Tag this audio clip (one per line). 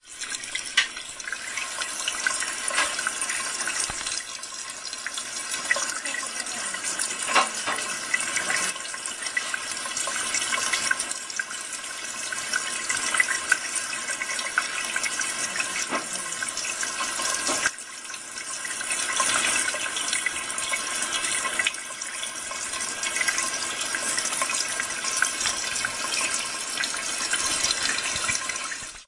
christmas
domestic-sounds
field-recording
kitchen
sink
water